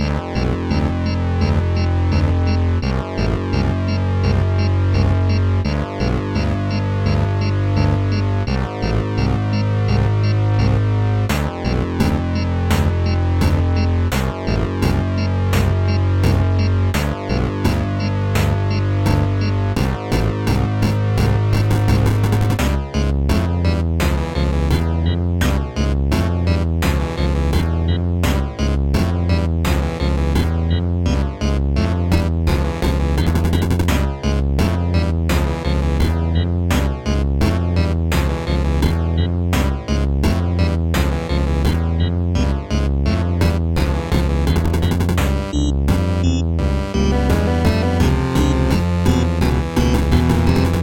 Retro tense loop
You can use this loop for any of your needs. Enjoy. Created in JummBox/BeepBox.
atmosphere, game, loop, music, retro, sample, soundtrack, tense, tension